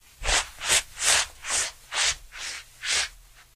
Shuffling backwards on a carpet
For a character who's trying to shuffle backwards, awkwardly out of a scene.